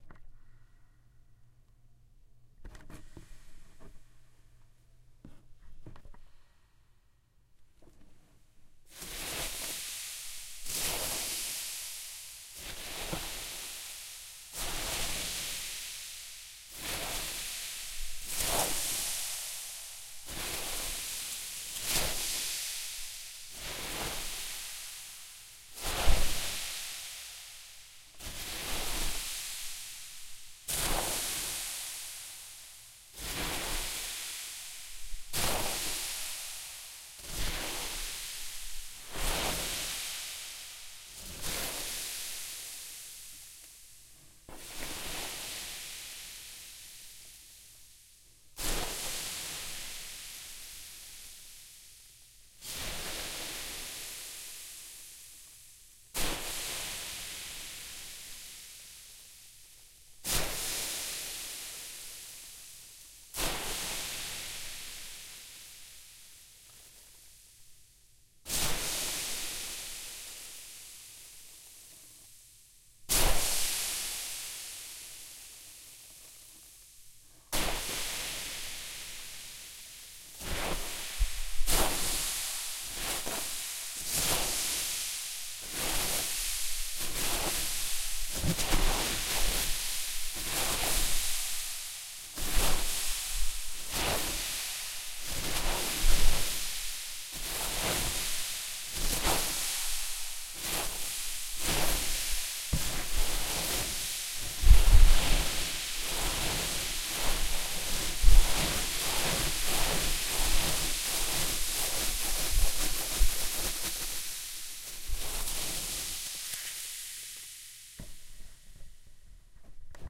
Waves Effect
Wave sounds I made with a box of styrofoam and some reverb.
surfing, waves, shore, seaside, wave, water, coast, beach, ocean, sea, splash, surf